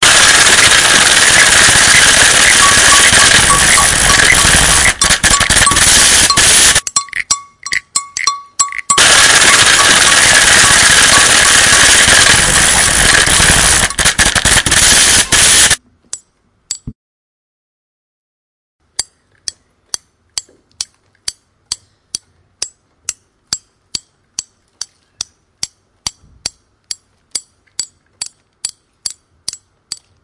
TCT soundscape MFR keyssie-hanife
Sounds from objects that are beloved to the participant pupils at La Roche des Grées school, Messac. The source of the sounds has to be guessed.
France, messac, mysounds